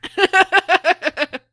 more laughing
Do you have a request?